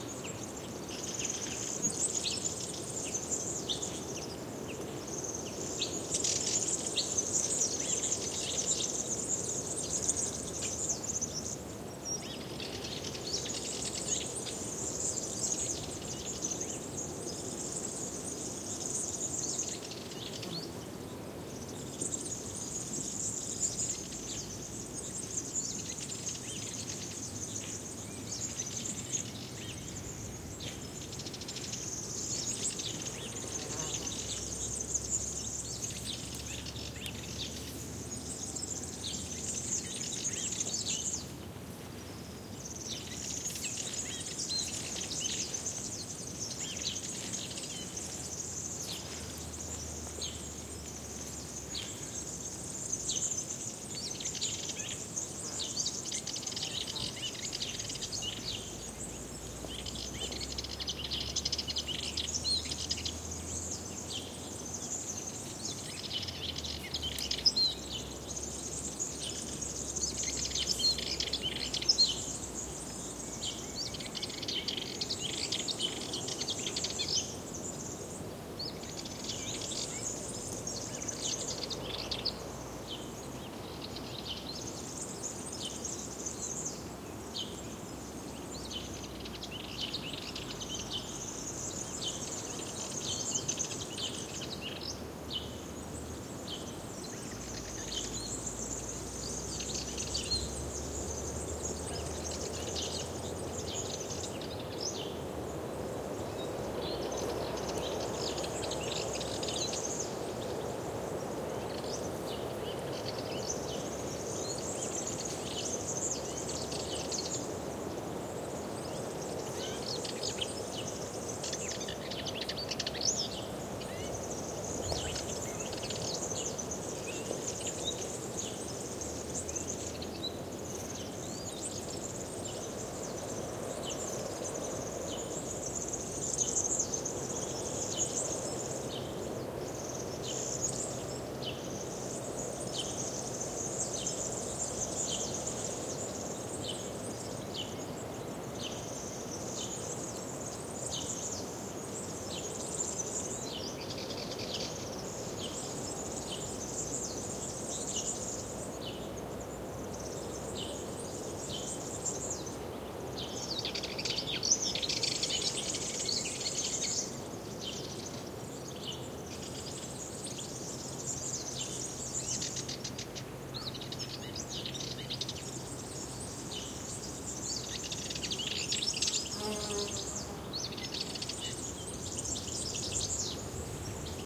20180313.forest.ambiance
forest ambiance, many birds calling, some insects and wind on trees. Audiotechnica BP4025 into SD MixPre-3
ambiance, wind, field-recording, trees, birds, nature, forest, south-spain